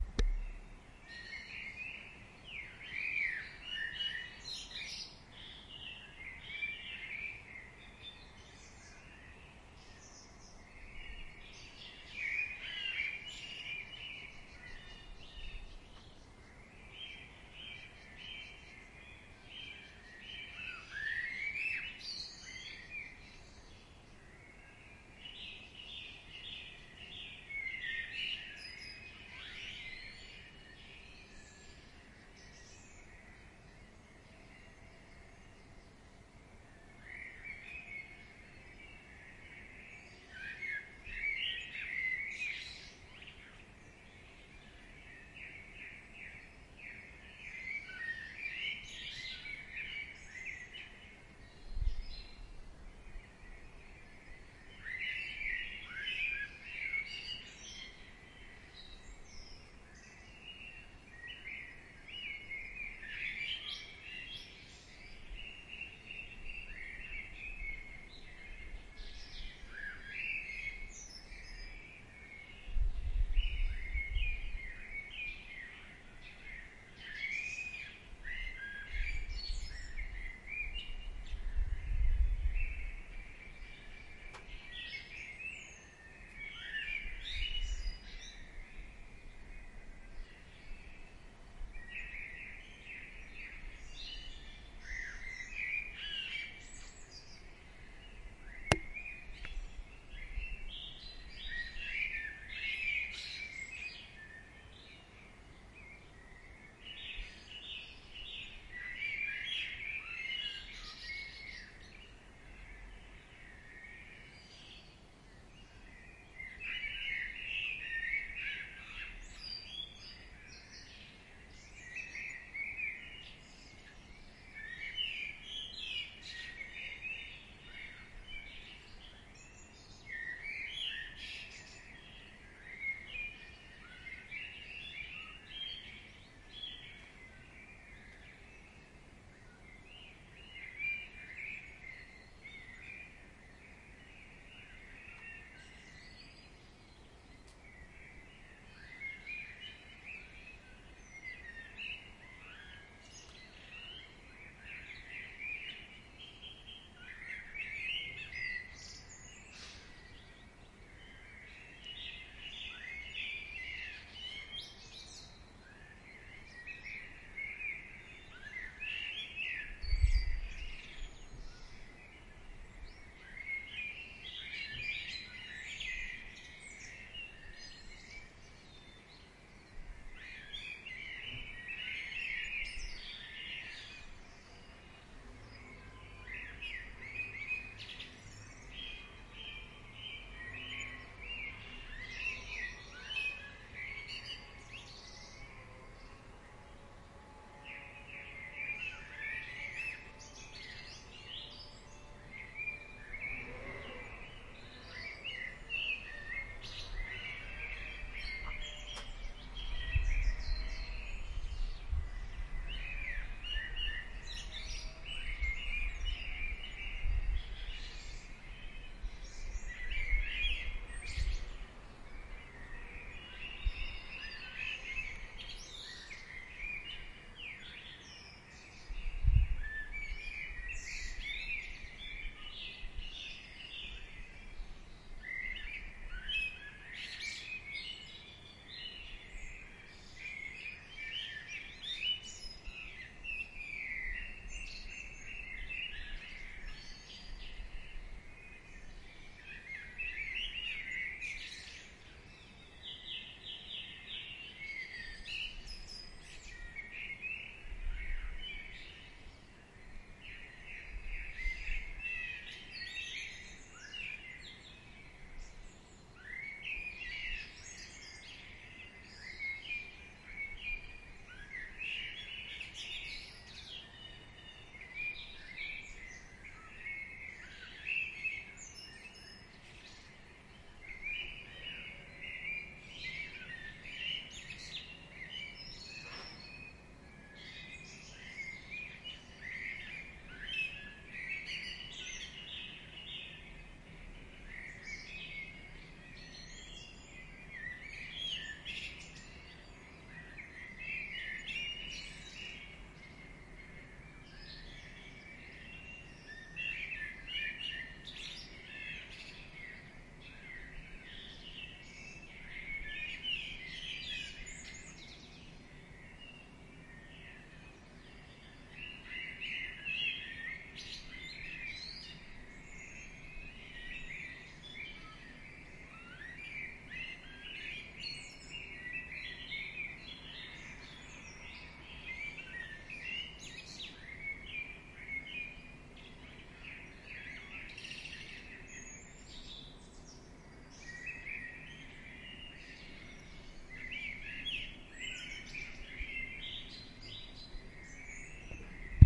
early morning
Recorded at about 5am local time straight from my window using a Zoom H1 (low cut on). Unfortunately there are slight wind and car noises.
bird, birds, birdsong, chirping, dawn, early, field-recording, morning, nature